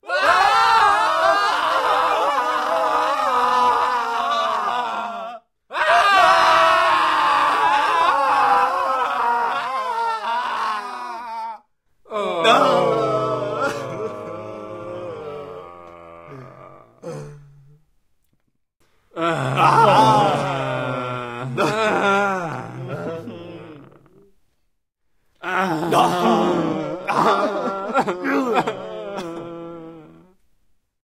Group of fighters being sad over their loss.
english, game, character, scream, loser, chant, game-voice, sad, loss, vocal, group, speak, language, animal, cartoon, shout, victory, voice